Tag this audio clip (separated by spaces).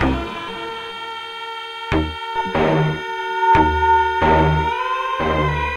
atmosphere baikal dark electronic loop sad